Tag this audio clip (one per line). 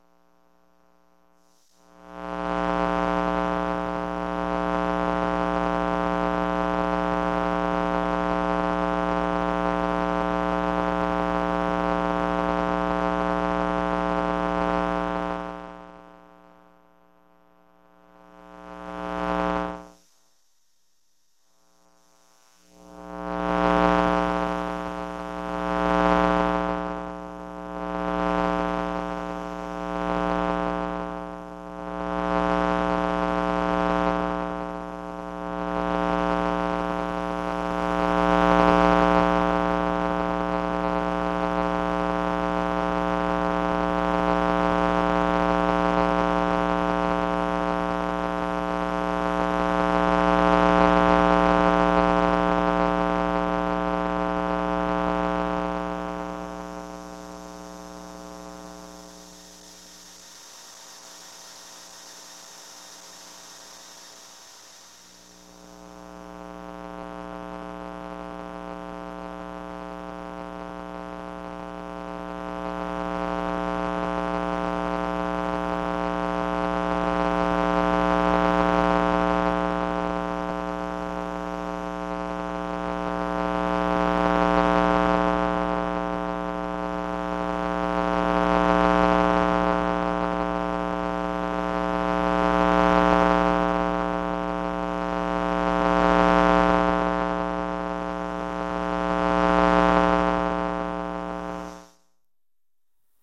hum
transformer
electromagnetic
electric